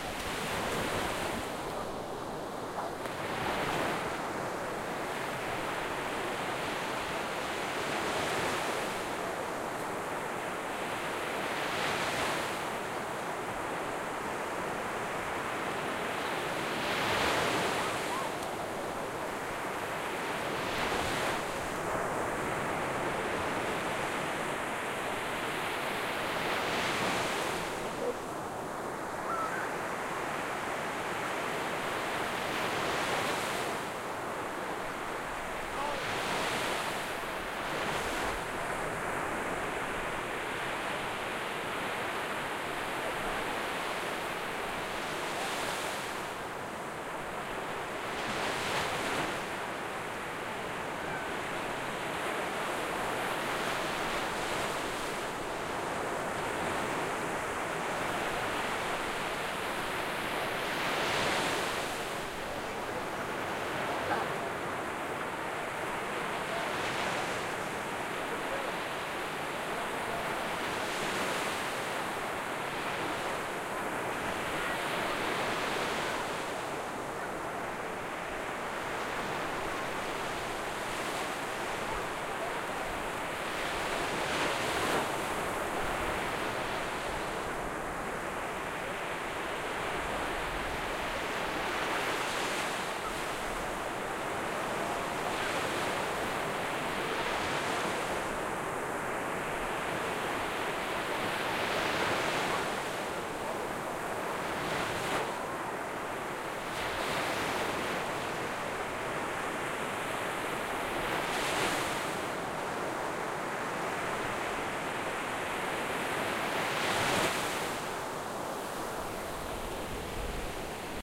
Recorded whilst sitting on Porthcurno Beach, Cornwall, England on a sunny but windy August afternoon. The tide was in and the waves were moderate, although they sound bigger on the recording. You can hear waves on the sand, rolling surf, kids playing and screaming and some seagulls. One of a series recorded at different positions on the beach, some very close to the water.
Cornwall, field-recording, ambience, nature, England, waves, soundscape, sea, beach, children-playing, atmosphere